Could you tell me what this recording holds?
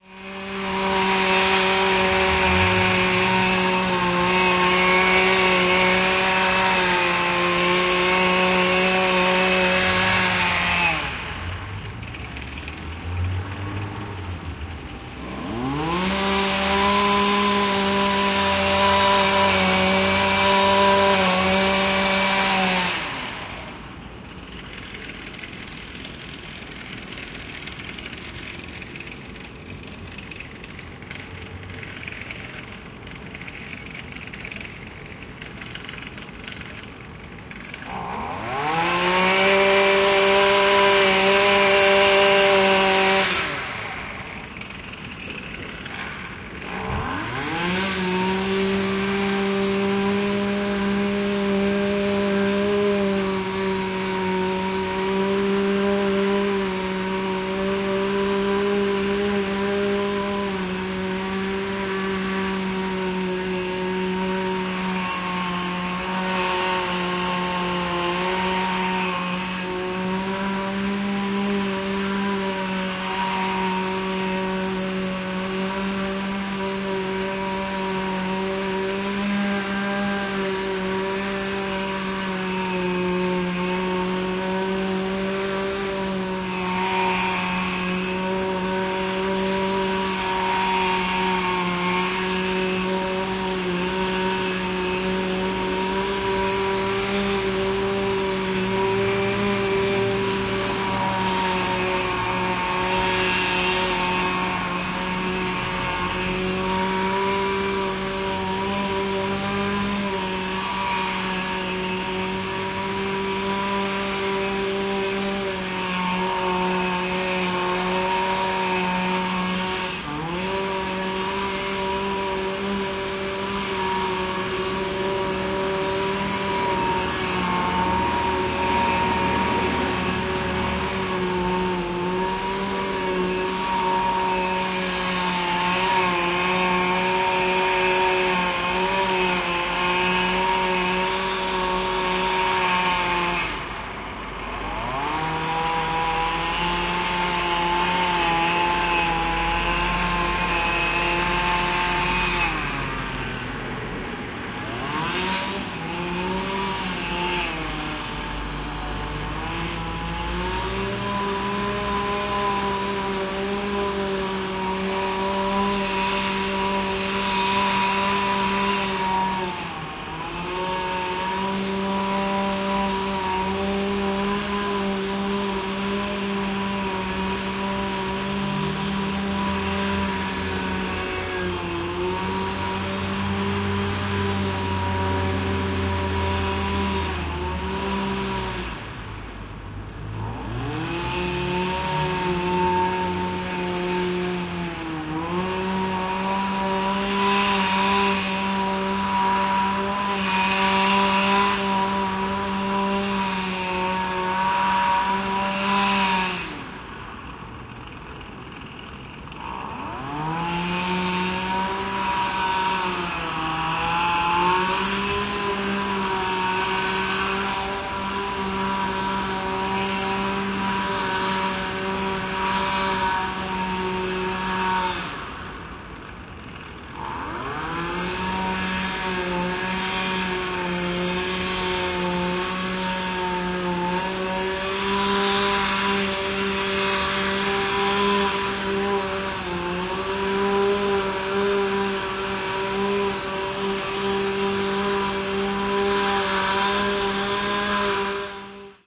lawn mower
grass, lawn, mower